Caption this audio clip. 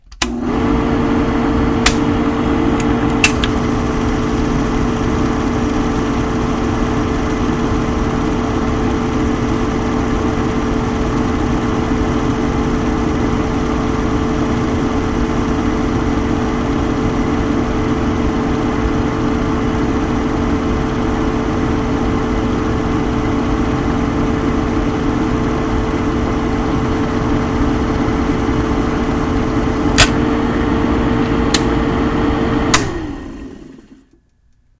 Bell and Howell Model 253 RV recorded with a CAD GXL2200 microphone. The sounds are the projector being turned on, the bulb being switched on and the film reel started. The process is reversed at the end.
8mm, film, projector